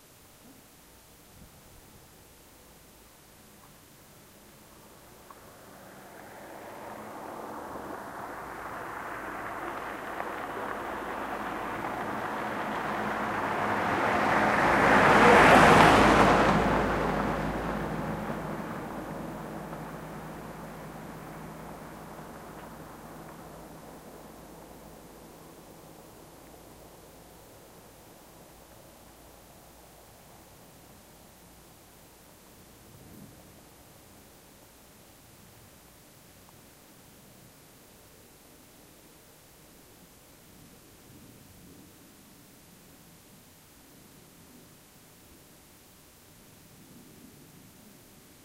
Car passing by, right to left on a small country road. Field recording.
Recorded with a Sony videocamera and a separate Sony Stereo-microphone
Car, Countryside, Driving, Passing, Passing-by